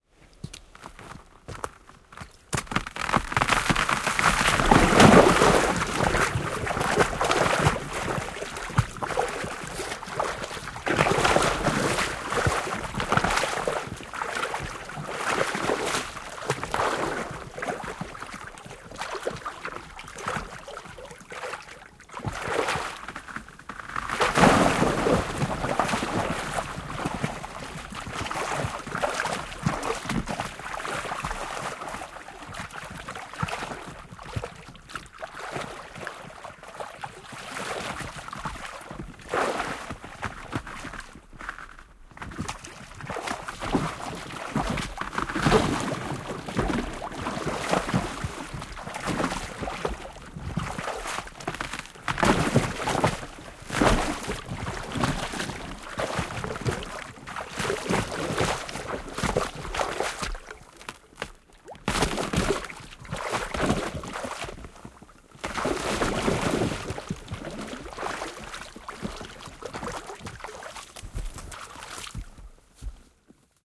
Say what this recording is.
Mies putoaa jäihin / Thin ice gives way, man falls into icy water, splashing
Heikko jää murtuu, mies putoaa veteen, loisketta, jää murtuu, lopussa mies pääsee ylös.
Paikka/Place: Suomi / Finland / Lohja, Retlahti
Aika/Date: 21.11.1988